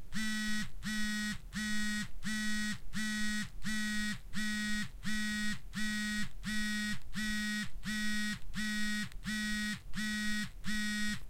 Cell phone vibration - long pulses
Cell phone vibrations, recorded with a Zoom H1.
Cell, phone, telephone, vibrate, vibrating, ringing, vibration